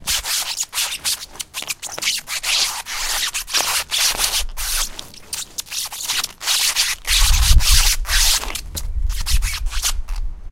schuh gequitsche
Schoes squeak on a wet surface.
shoes, squeak, wet